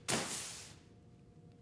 Theatrical Sandbag tossed towards microphone.
Recorded with AKG condenser microphone M-Audio Delta AP